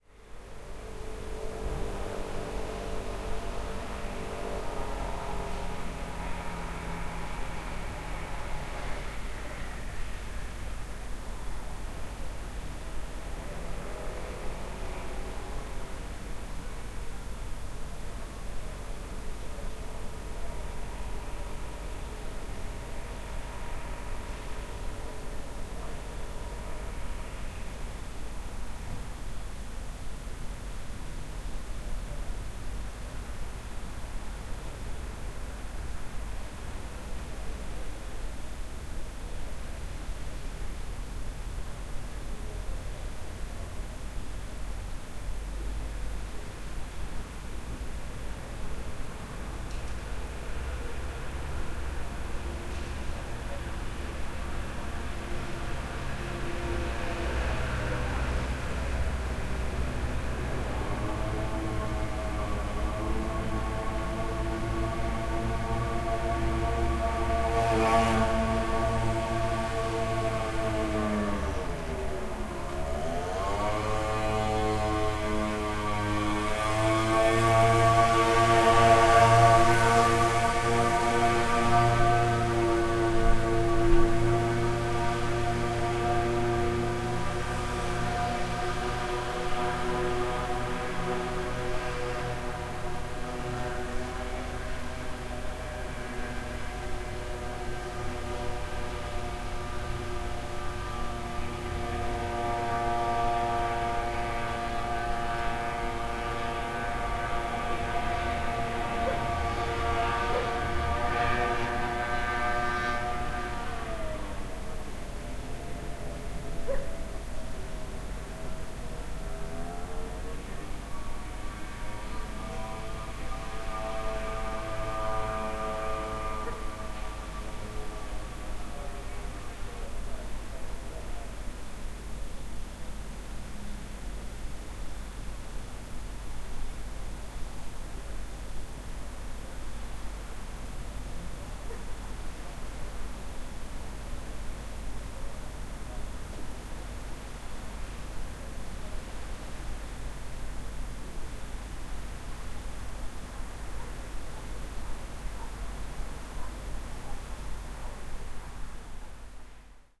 VILLAGE AFTERNOON AMBIENCE
Quiet afternoon atmosphere in the small village
afternoon, ambience, country, countryside, field-recording, moped, rural, village